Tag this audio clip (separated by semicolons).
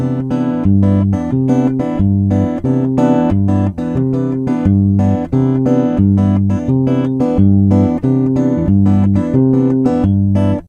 Major; Nova; Bossa; 90bpm; SS; C; EMG; Guitar; 89; 9